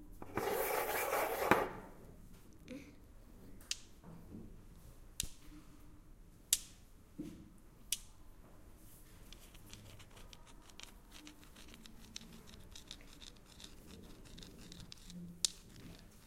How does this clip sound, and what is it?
Two small stones from Brasil